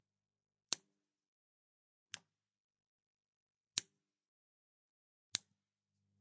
A small light switch (plastic) on a bedside lamp being switched on and off, picked up very closely.